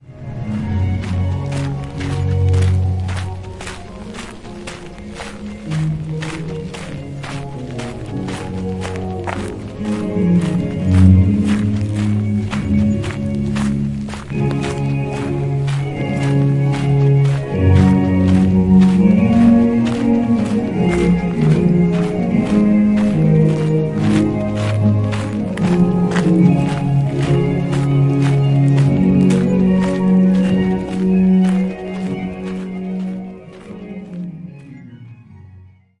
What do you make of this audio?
Marche dans le Jardin
Recorded during Musical Fountains Show at Versailles palace (by night).
Footsteps. Music playing in background.
footstep, footsteps, garden, park, Versailles, walk, walking